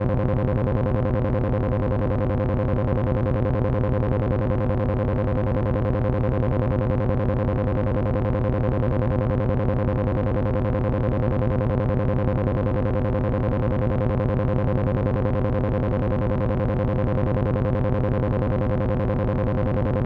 a dark cartoonish repetitive drone. Created on a Roland System100 vintage modular synth.